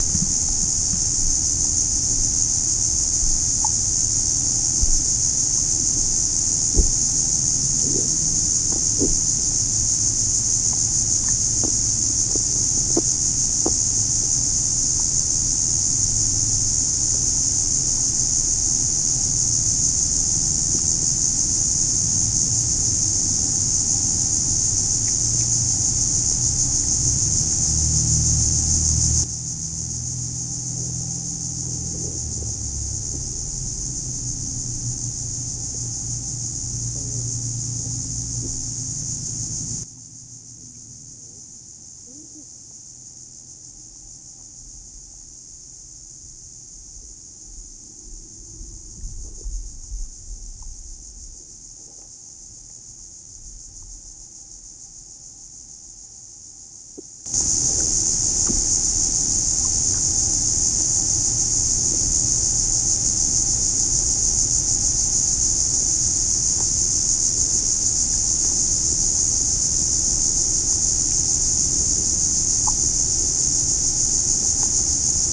sesitivity action
field-recording, hydrophone, underwater